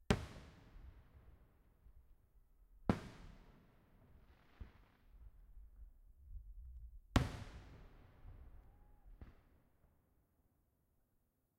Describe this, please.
Fireworks in a small valley, over a lake. Two large explosions, one with a crackle.